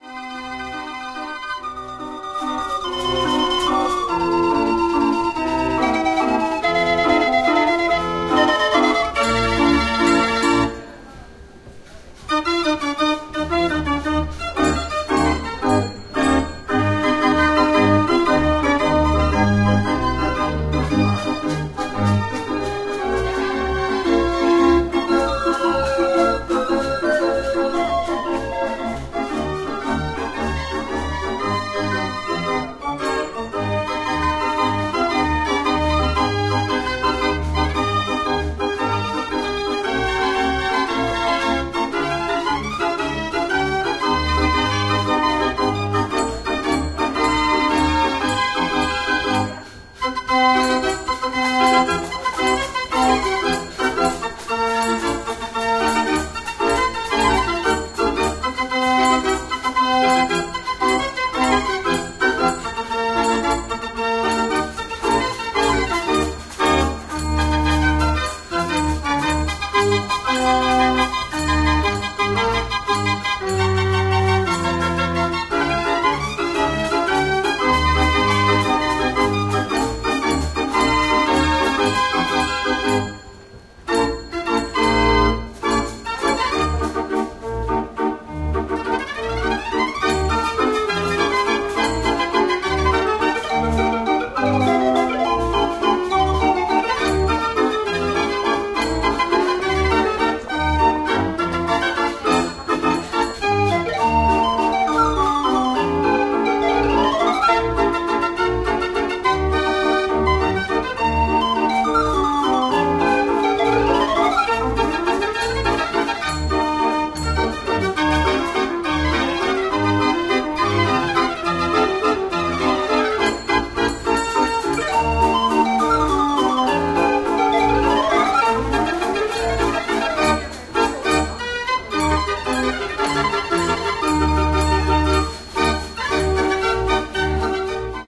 Mechanical Street Organ - The Hague
Orchestrion (mechanical street organ) recorded in Den Haag city-center.
automaton, calliope, European, Holland, mechanical, merry-go-round, Netherlands, old, pipe, street, The-Hague